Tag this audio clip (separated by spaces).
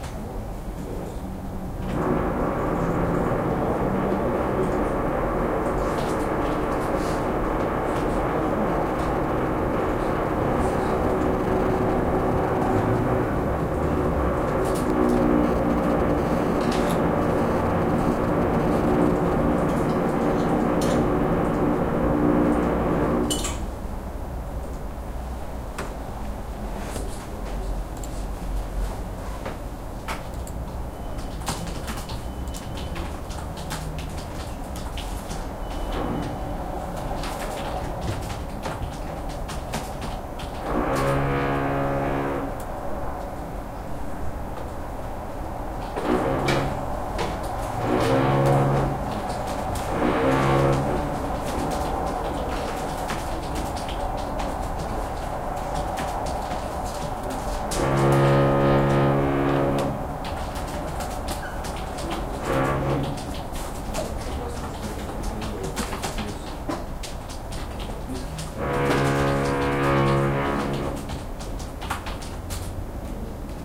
city din keyboard noise office roar rumble thunder work